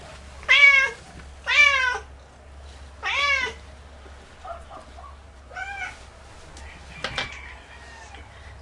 20100423.hungry.cats.03
hungry cat begging for food. Shure WL183 into Fel preamp and Olympus LS10 recorder
cat, field-recording, kitten, miaw, miau, miaou, miaow